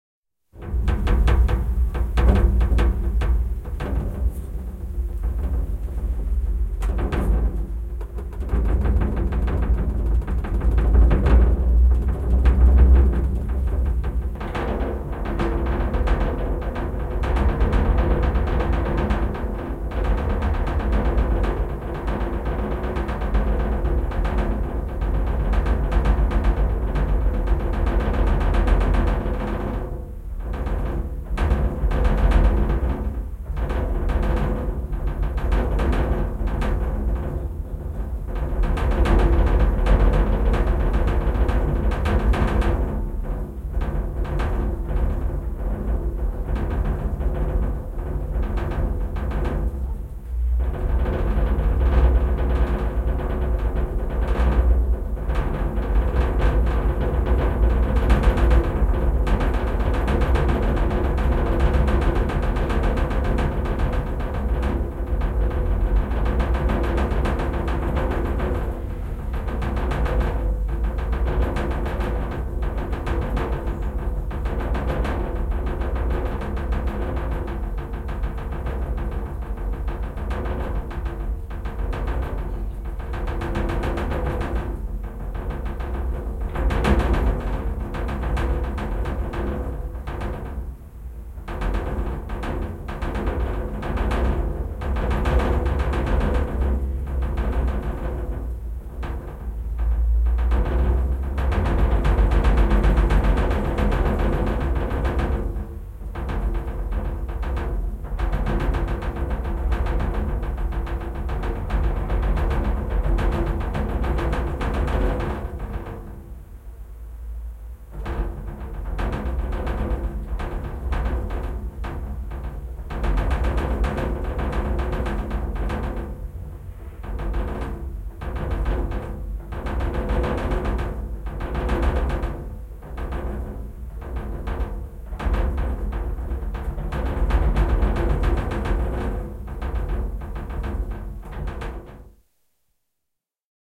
Lasiruutu resonoi, lasi tärisee / Window, glass pane, resonating, low frequence rumble, rattle, a close sound
Ikkuna kolisee, helisee, lasi tärisee, resonoi, matalaa jyminää. Lähiääni.
Äänitetty / Rec: Analoginen nauha / Analog tape
Paikka/Place: Suomi / Finland / Yle / Tehostearkisto / Soundfx-archive
Aika/Date: 1980-luku / 1980s